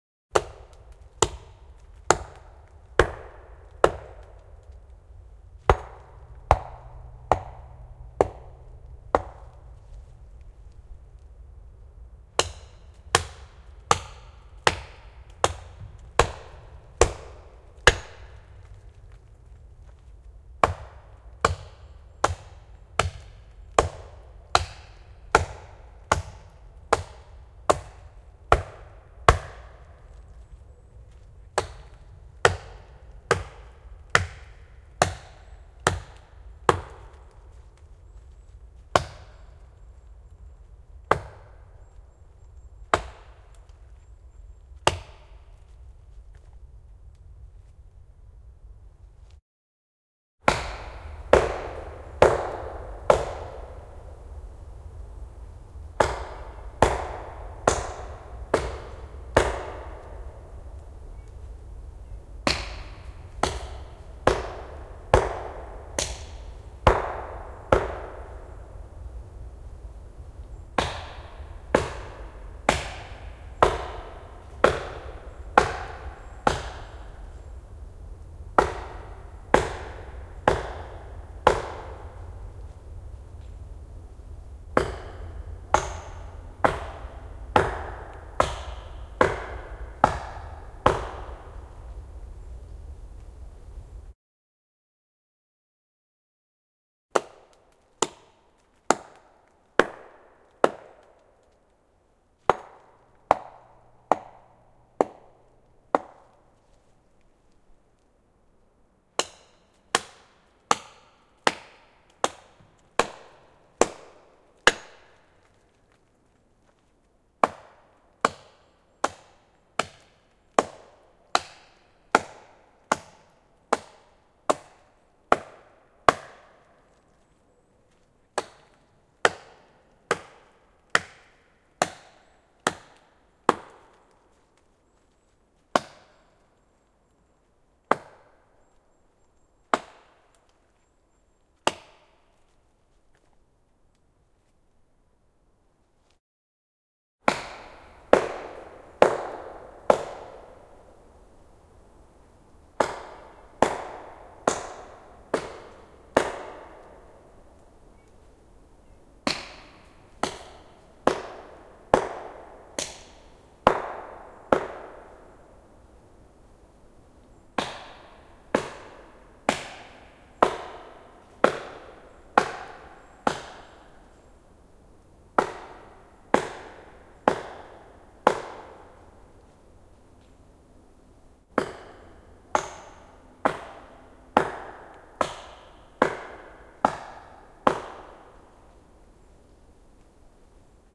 axe chopping (in the forest)
It’s two ways of recording in the forest: close and far.
And 2 areas: processed sounds and the original sound (raw).
Recorded with Zoom H6 + XYH-6 capsule, edited, equalized, normalized.
impact, forest, echo, chopping, percussion, outdoors, hit, hatchet, metal, wood, chop, reverberation, cut, tree, axe